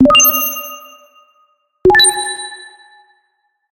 Electronic Beeps
Two beeps, one as a selection, other as a confirmation or "complete" sound.